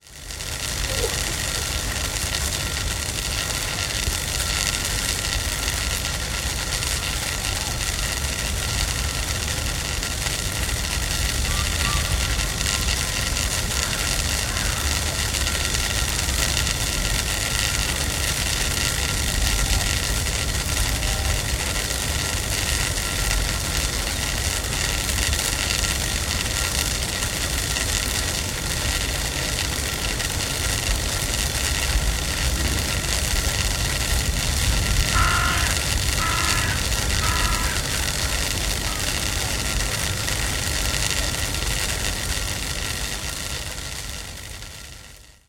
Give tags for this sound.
circuit; power; pylon; buzz; buzzing; electricity; power-line; electric; hum

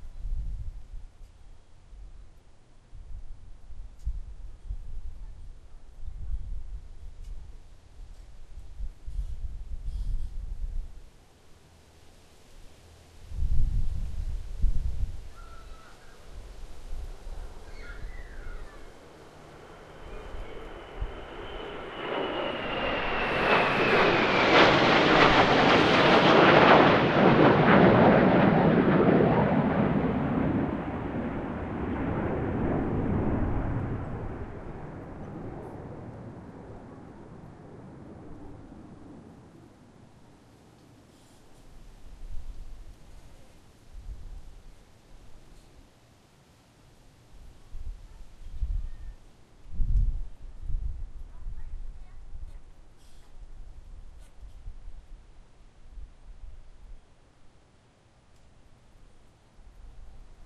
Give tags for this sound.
military; fly-over; jet; fighter; superhornet; f-18

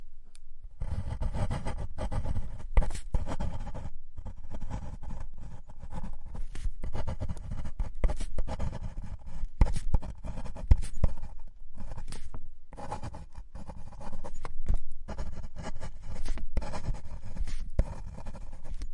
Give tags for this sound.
drawing; glass; paper; pen; scribbling; writing

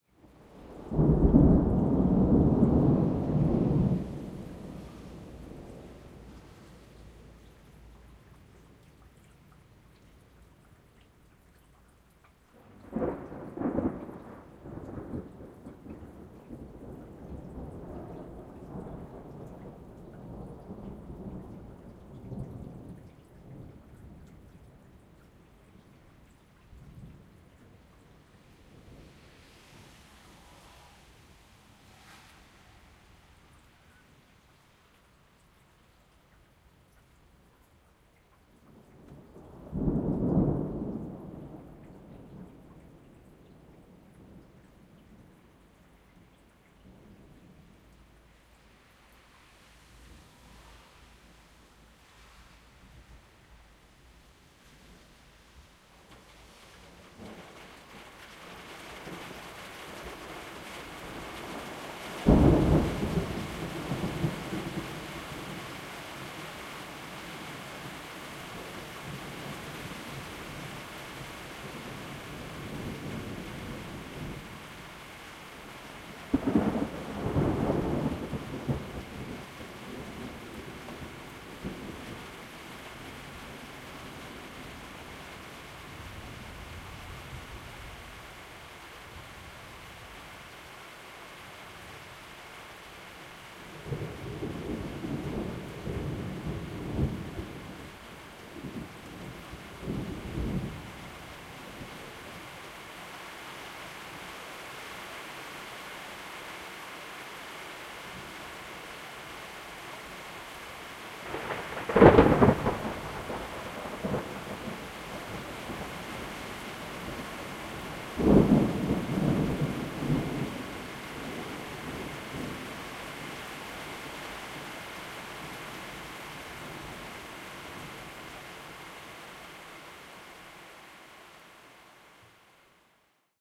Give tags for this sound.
weather; nature; field-recording; thunderstorm; thunder; lightning; rainstorm; storm; rain

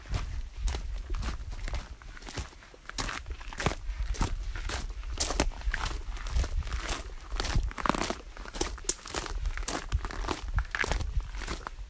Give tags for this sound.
crunch; feet; field-recording; footsteps; hiking; step; steps; walking

